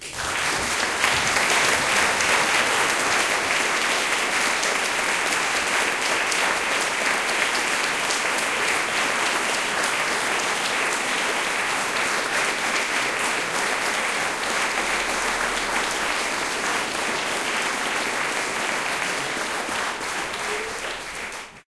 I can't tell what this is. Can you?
applause medium
Recorded in a medium concert hall
applause, audience, auditorium, clapping, concert, crowd, medium, theatre